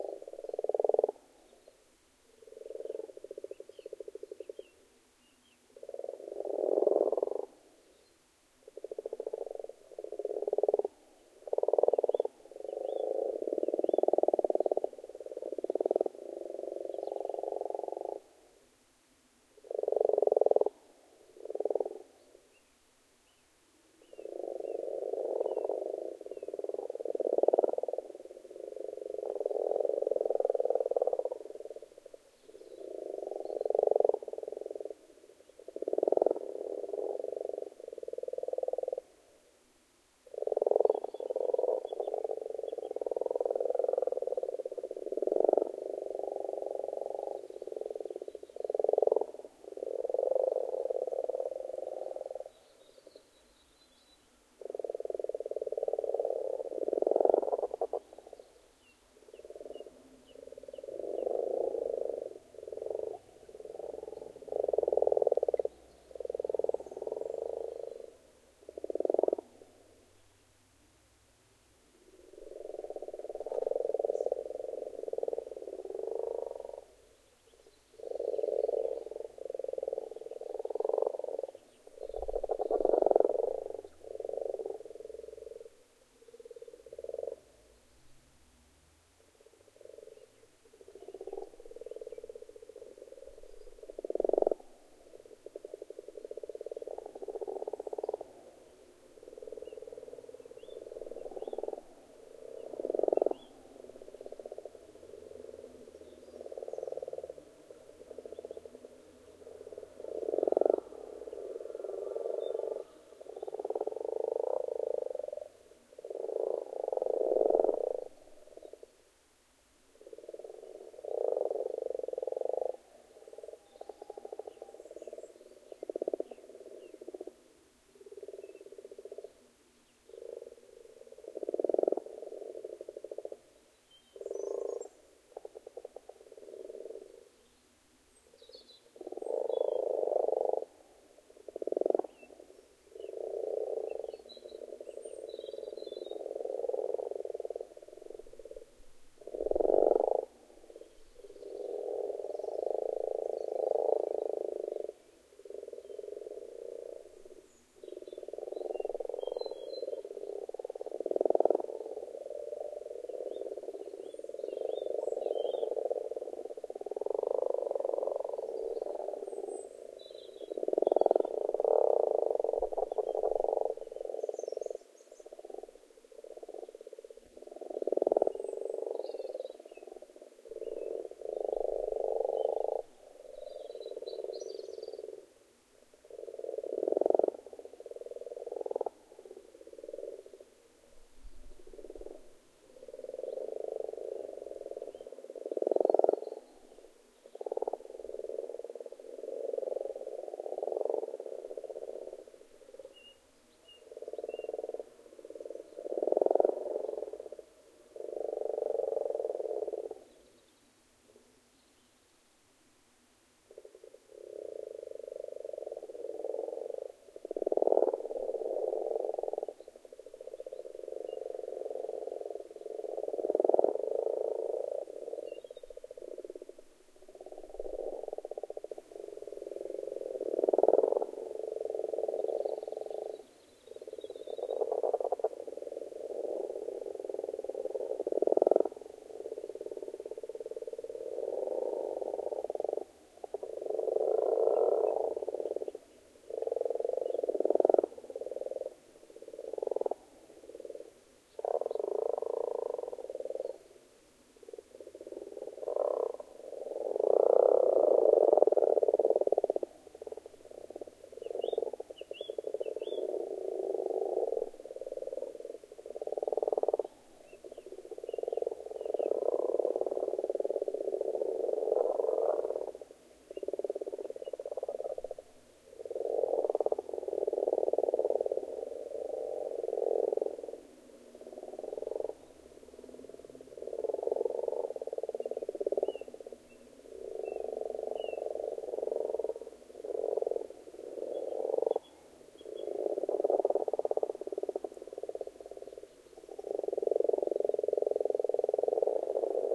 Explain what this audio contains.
Frogs In A Pond
A stereo field-recording of frogs (Rana temporaria) croaking at springtime in a garden pond . Lavalier mic (unknown make) > Sharp MD
croaking, field-recording, frogs, stereo